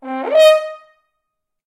horn rip C4 Eb5
A "rip" is a quick glissando with a short, accented top note. Used in loud music or orchestral crescendos as an accented effect. Recorded with a Zoom h4n placed about a metre behind the bell.
brass, c, c4, eb, eb5, e-flat, e-flat5, french-horn, glissando, horn, rip